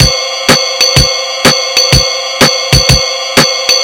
swing drum-loop sampled from casio magical light synthesizer